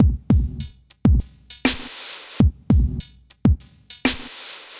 drum-along 100
quirky idm Drum loop created by me, Number at end indicates tempo